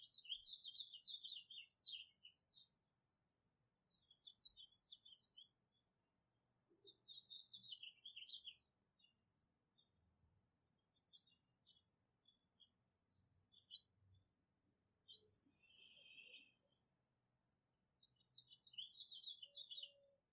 Birds chirping in the morning, spring.
birds, birdsong, field-recording, morning, nature, outdoors, outside, spring, tweet